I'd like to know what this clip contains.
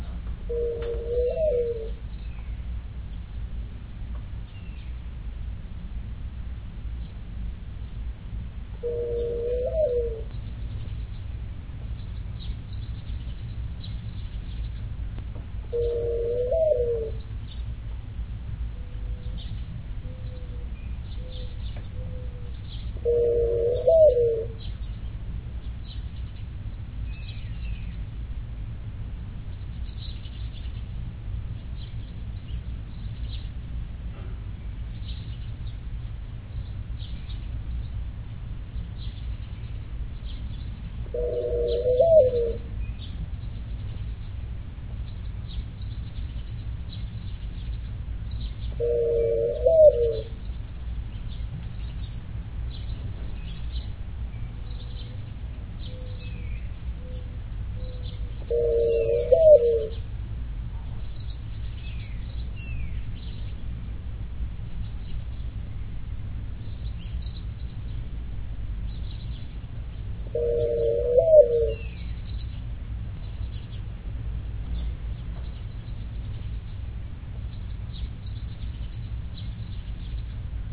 morning doves raw
Two mourning doves: one close, one far distant, in a mating call and response. Pretty clean, spring pre-air conditioner pollution season background with light birdsong way back.
Recorded @ 8Khz with a Griffin iTalk mic to a recently refurbished 20GB 3rd generation iPod - my favorite lo-fi field recording / interview rig. If you have a recording device on your person, you get a lot of stuff you'd otherwise miss altogether. This rig helps me get those things too ephemeral to capture with a stereo pair; sounds I would regret not having at all. I keep it on my belt 90% of the time. Just in case.